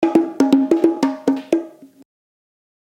JV bongo loops for ya 1!
Recorded with various dynamic mic (mostly 421 and sm58 with no head basket)
bongo, congatronics, loops, samples, tribal, Unorthodox